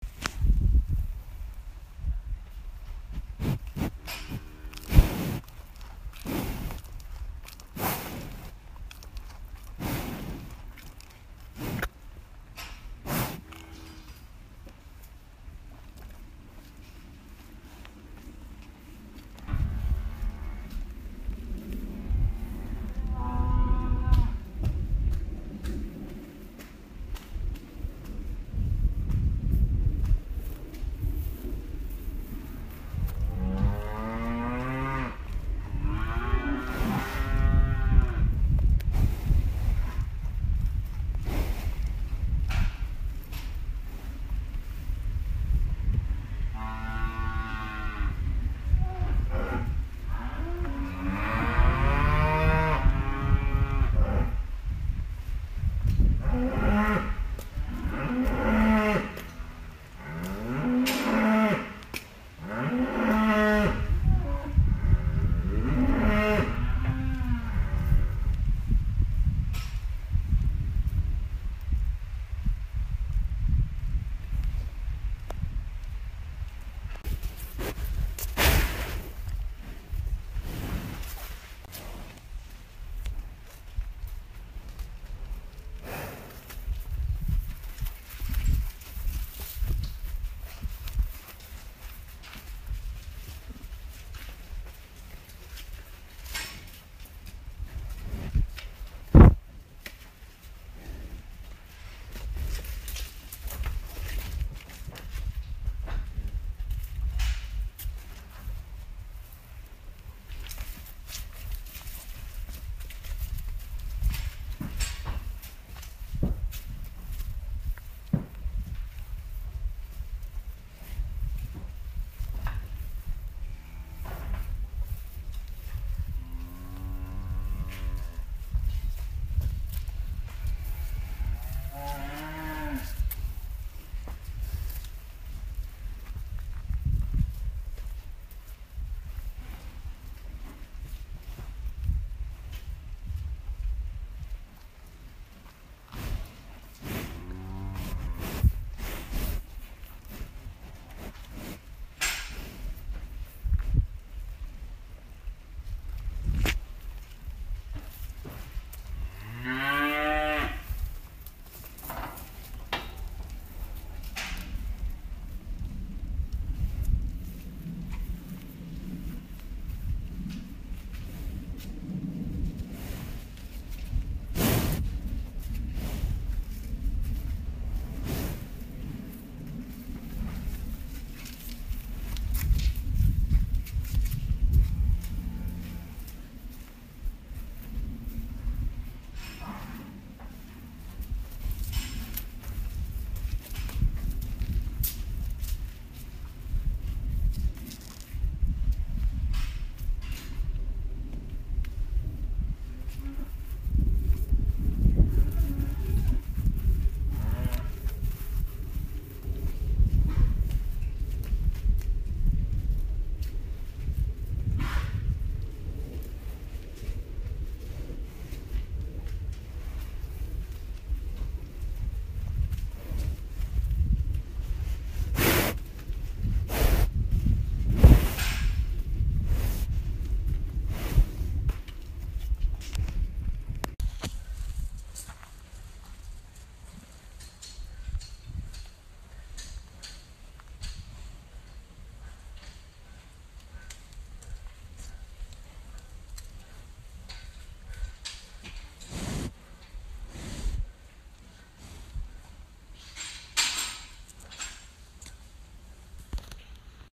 Cow sounds including snorting and mooing among others.